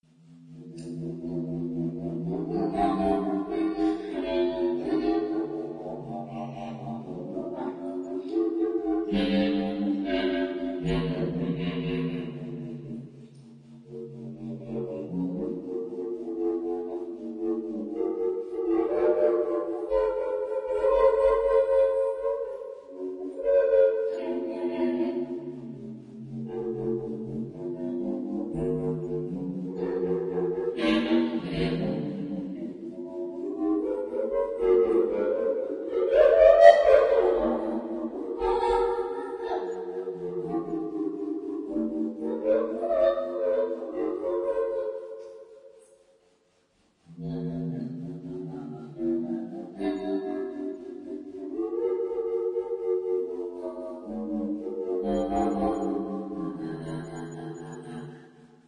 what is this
two members of an unfamiliar species in discussion.